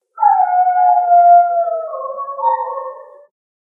Dog Howl
DOG, DOGGO, perro